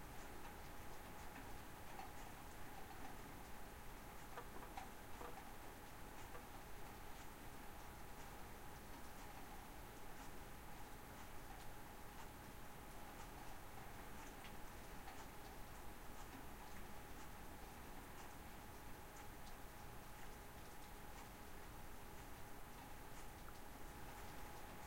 inside-rain-light-2
Light rain heard from inside my home.